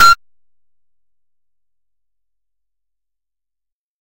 Tonic Short harsh Electronic Noise
This is a short harsh electronic noise sample. It was created using the electronic VST instrument Micro Tonic from Sonic Charge. Ideal for constructing electronic drumloops...